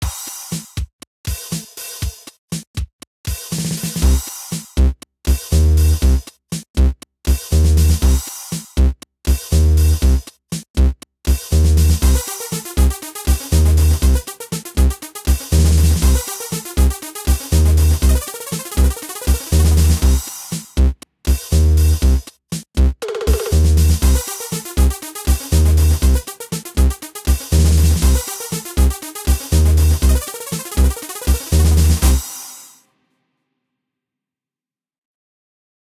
Little piece of music for crazy scene :)
Best regards!
electronic, loop, music, song